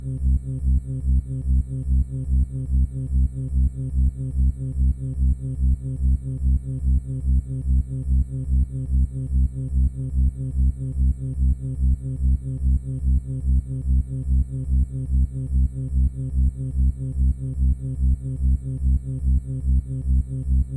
Alarm sound 6
A futuristic alarm sound
Alarms, Bells, Electronic, Futuristic, Noise, Sci-Fi, Space, Whistles